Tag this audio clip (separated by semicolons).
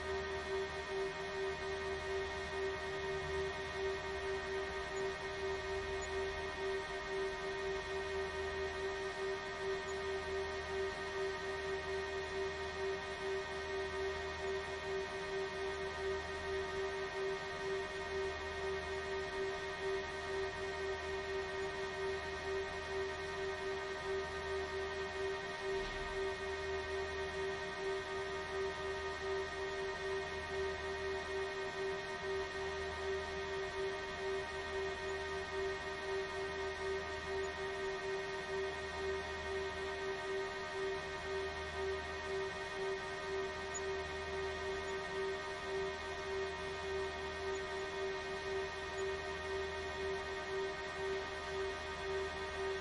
Internet; Server; Office; Computers; Data-server